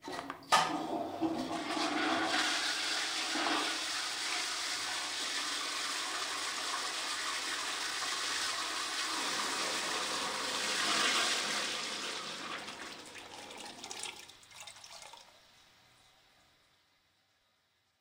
pull the water at home